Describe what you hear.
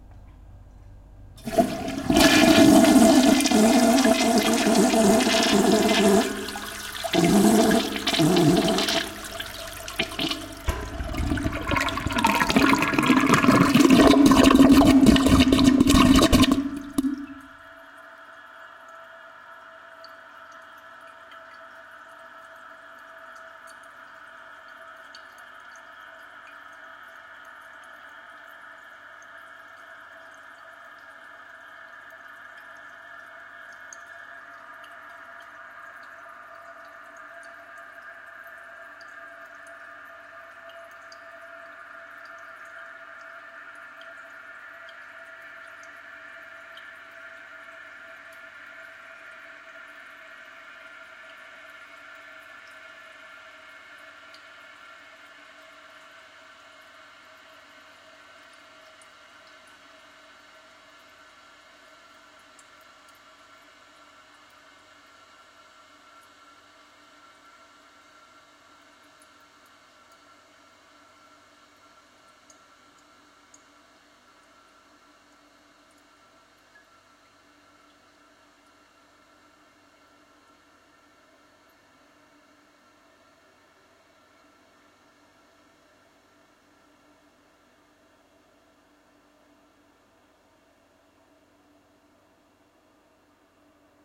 Thailand toilet flush crazy in large live bathroom
bathroom, crazy, flush, live, Thailand, toilet